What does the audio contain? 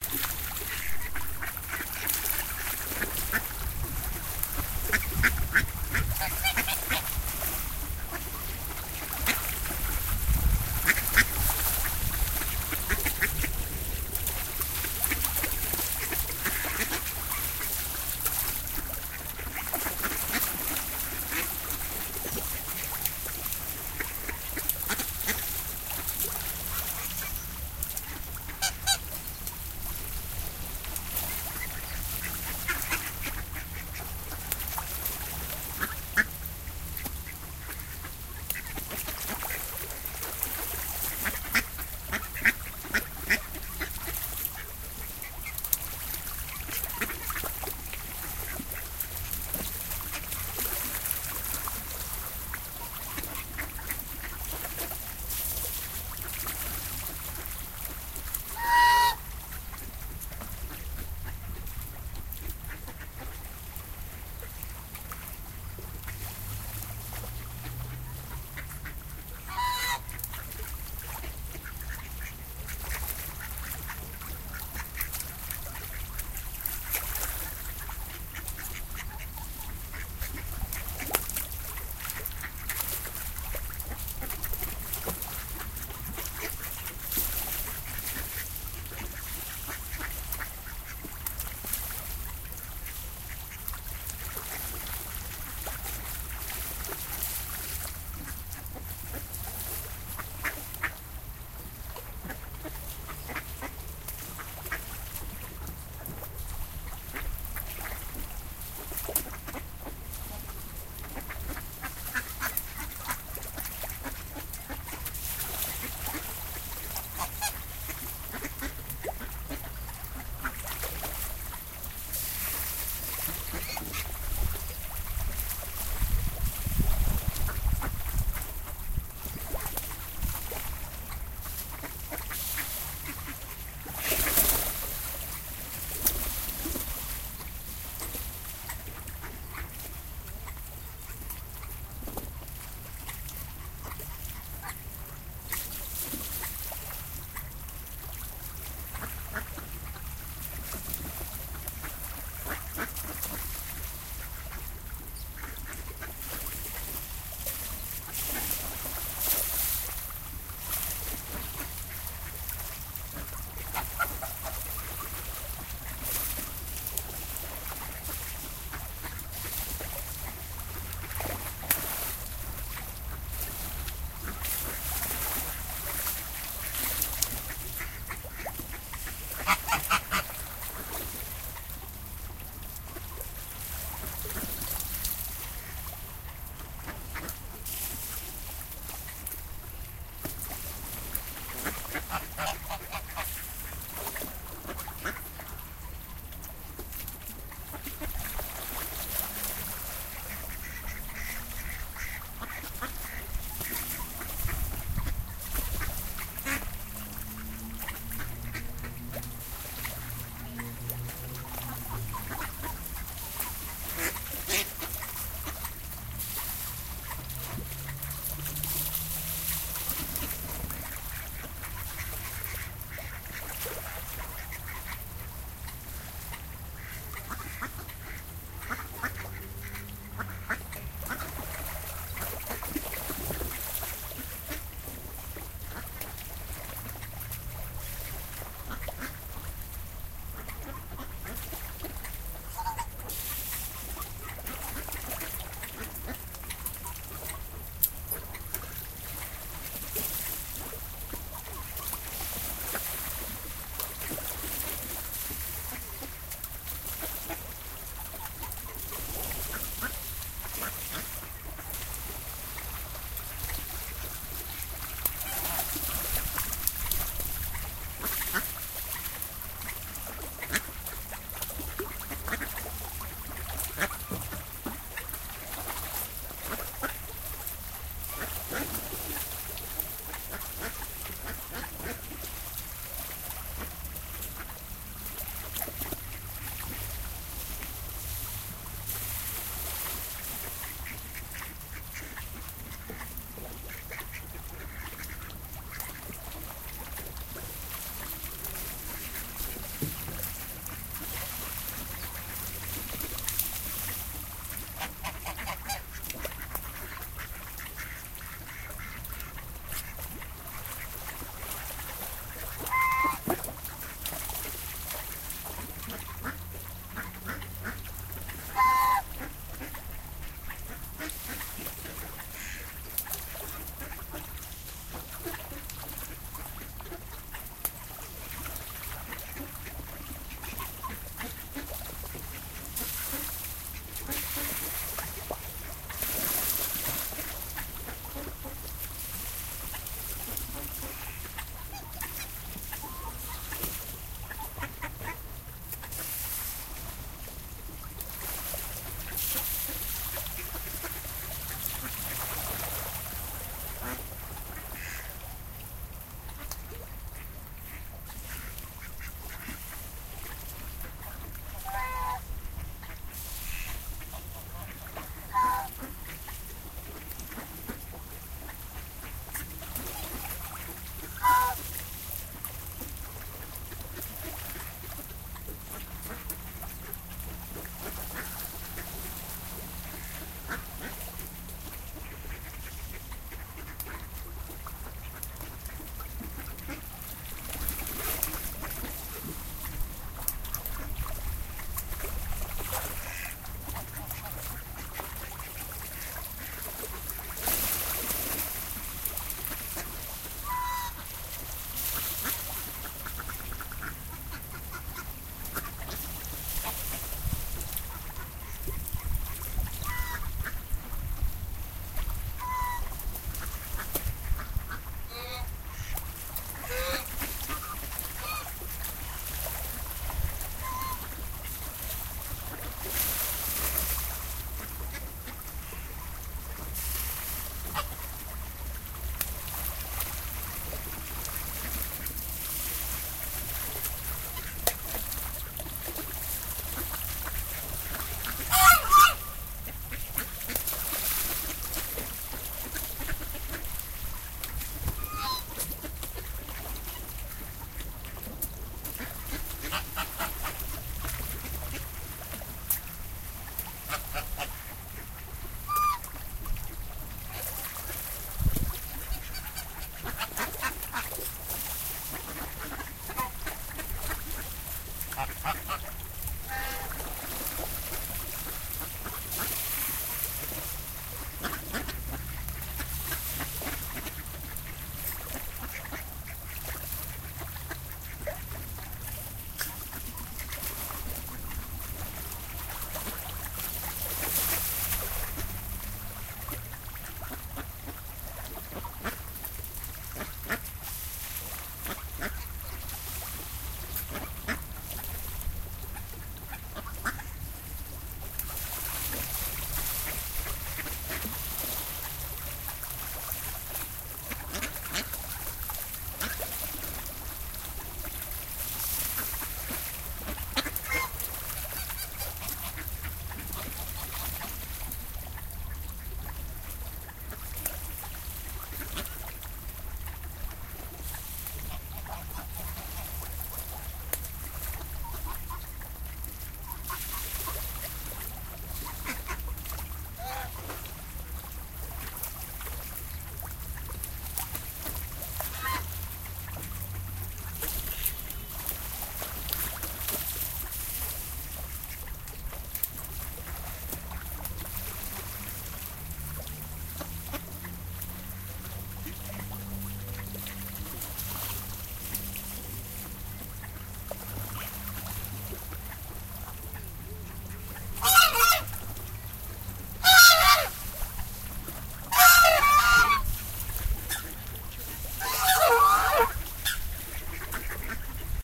Several types of ducks swimming in a lake at the park of Athalassa in Nicosia, Cyprus.
The recording took place on November of 2018.